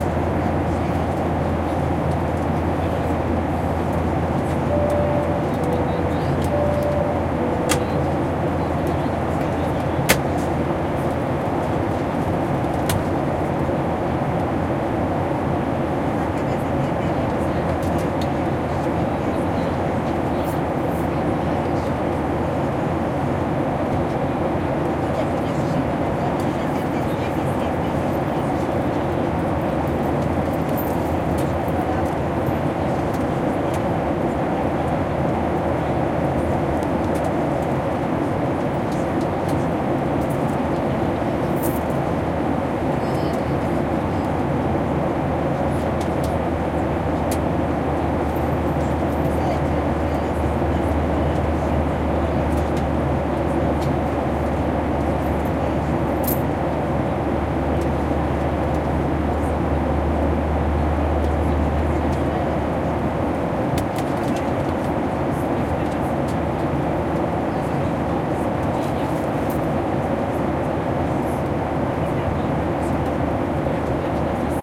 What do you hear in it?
inflight atmo MS
aeroplane, airplaneaircraft, ambience, atmo, cabin-noise, jetfield-recording, machines, plane, stereo